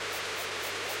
The Mute Synth 2 is mono, but I have used Audacity cut and put together different sections of a recording to obtain a stereo rhythmic loop.
Mute-Synth-2, Mute-Synth-II, noise, rhythm, rhythmic, seamless-loop, stereo